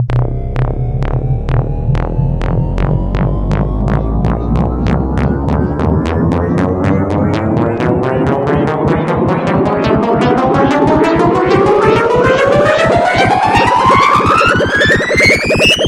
Machine Startup
An SFX I created for my game. I used 3ML Piano Editor and Adobe Audition (for the tone generator), and Audacity for editing.